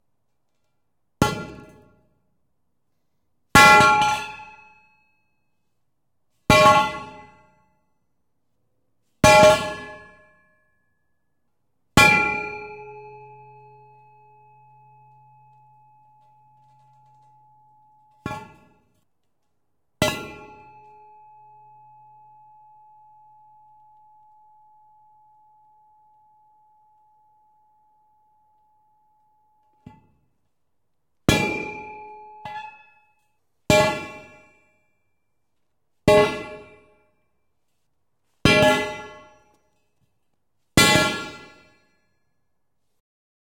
Medium Steel Pipe On Concrete 1
Medium size and weight steel pipe dropped into concrete floor. Recorded in a 28,000ft³ shop so there is some natural reverb. I believe the Rode M3 mic itself was overloading due to the SPL and that's where the raspy distortion is coming from.
Rode M3 > Marantz PMD661.
metal-pipe, steel-pipe, hit, percussion, clank, drop, impact, steel, resonance, metal, ringing, smash, clang, ding, strike, industrial, ping, metallic, sustained